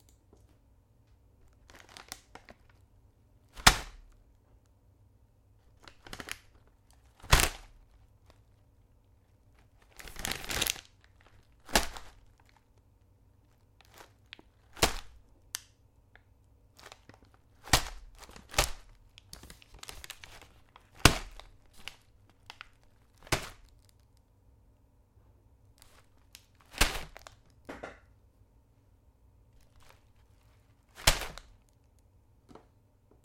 sunflower seeds bag thrown
Bag of seeds being thrown around